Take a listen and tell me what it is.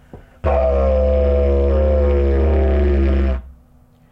Made with a Didgeridoo